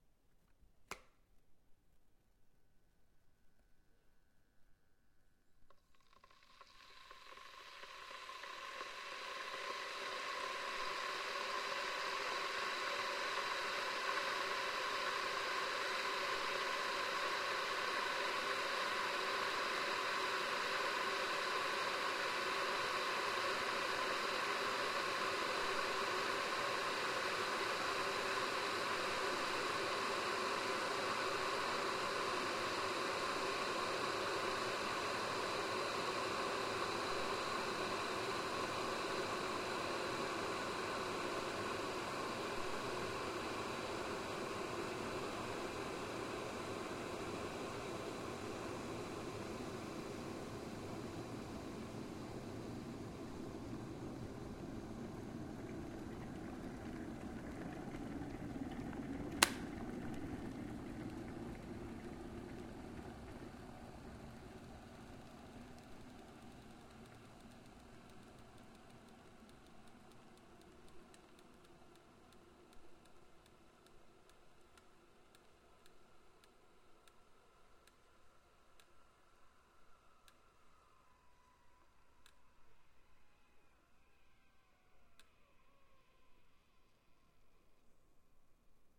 * boil water
* in kitchen
* in electric kettle (plastics)
* post processing: removed clicks (00:00:04.6, 00:00:06.7, 00:01:21)
* microphone: AKG C214